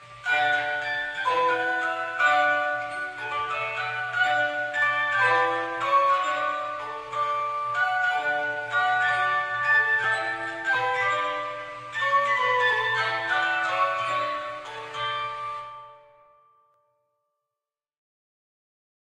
Music Box4
A collection of creepy music box clips I created, using an old Fisher Price Record Player Music Box, an old smartphone, Windows Movie Maker and Mixcraft 5.
Antique, Chimes, metallic, Music-Box